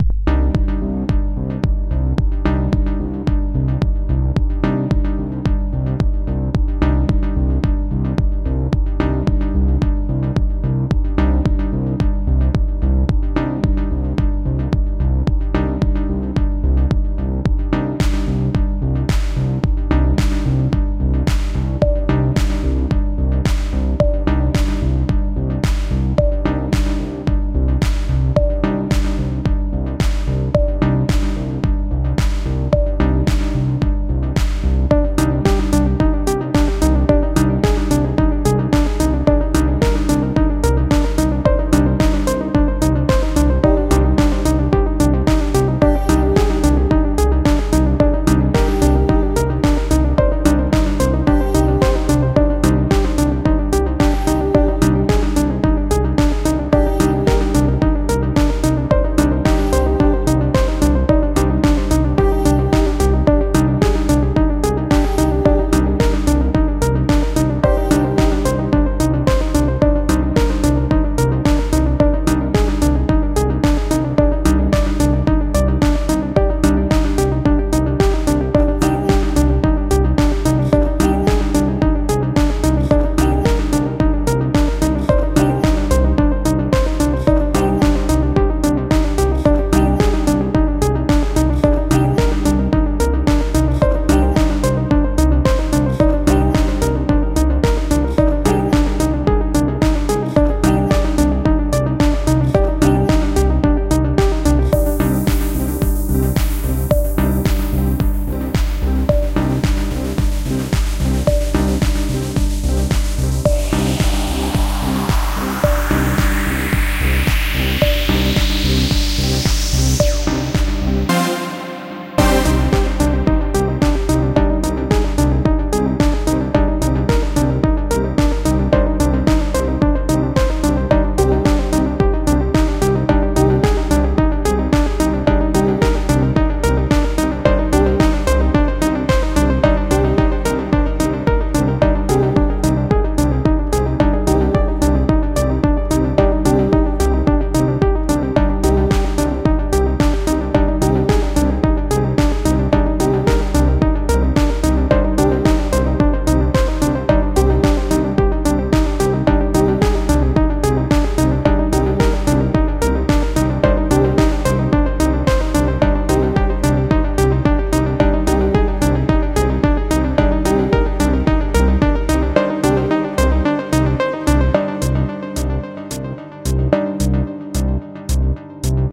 love technohouse & peace.
Synths: Ableton live,Silenth1,Massive,Synth1
rave, peace, loopmusic, synth, dance, electro, beat, sound, ambient, techno, love, bass, effect, sequence, trance, fx, loop, space, house, club, pad, melody, music, electronic, progression, technohouse